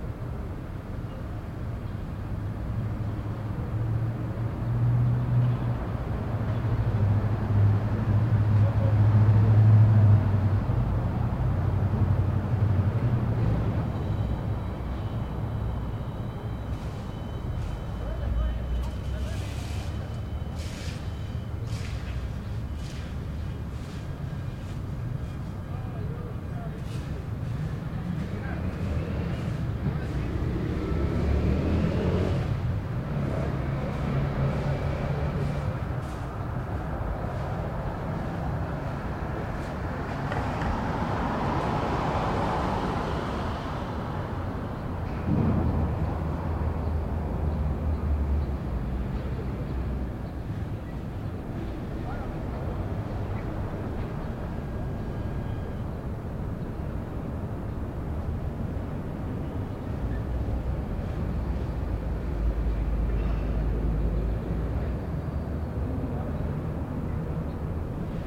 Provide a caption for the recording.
sulivan's gultch
Just what it sounds like from my bedroom window. Cars going by, people talking, etc. Recorded in a section of Portland, Oregon named Sulivan's Gulch.